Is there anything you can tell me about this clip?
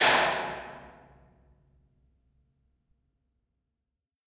One of a series of sounds recorded in the observatory on the isle of Erraid